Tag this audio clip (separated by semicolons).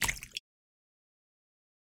aqua; aquatic; bloop; blop; crash; Drip; Dripping; Game; Lake; marine; Movie; pour; pouring; River; Run; Running; Sea; Slap; Splash; Water; wave; Wet